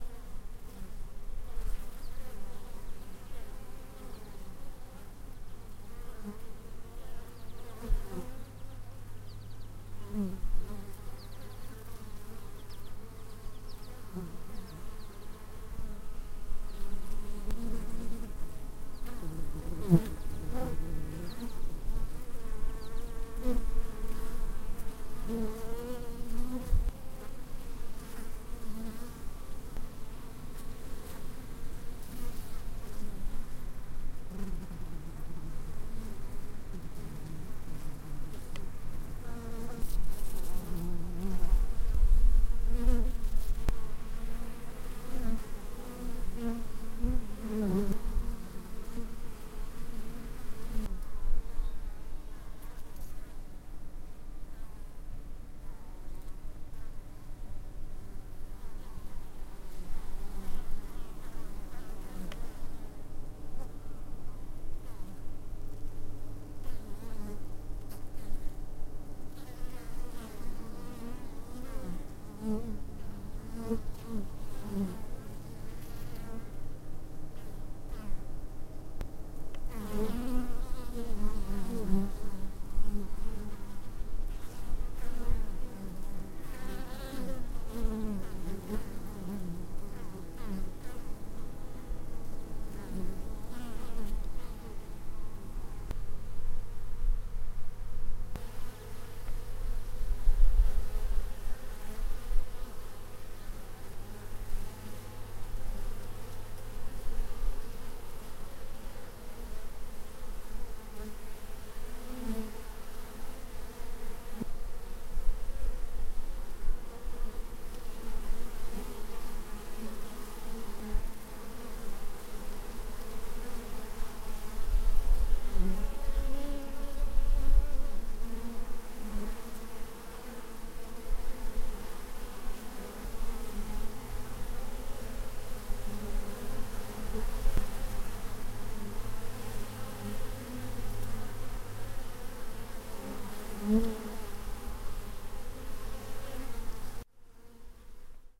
bees in meadow close to a hive
We were doing a shoot for a Chinese television station and I let my canon 7D pic up some sounds, edited some of the pops out and there you have it. Some bees in a meadow with some churping birds near commercial hives in the late spring. Good for ambient background sound with bees everpresent. In the Fraser Valley, British Columbia.
ambiance, ambience, ambient, bee, bees, birds, churping, field-recording, hive, insects, meadow, nature, sound, spring